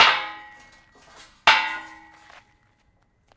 Metal Resonant hits 1
two resonant metal hits with same object
resonant, harmonics, hit, metal, disharmonics